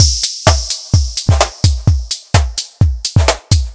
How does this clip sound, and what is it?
House Beat (128 BPM, made in LMMS + Kicker)
I'm getting better at making drum sounds from scratch
128bpm, 130-bpm, drum-loop, drums, music, percussion, percussion-loop, snare